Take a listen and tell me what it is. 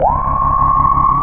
Korg Polsix with a bad chip